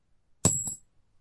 Coins Drop - 11
Coins being dropped